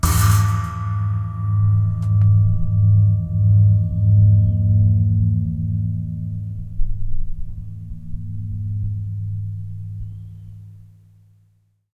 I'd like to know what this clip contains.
Nagra ARES BB+ & 2 Schoeps CMC 5U 2011
A small chinese cymbal hit with hand, very close with phaser and compressor.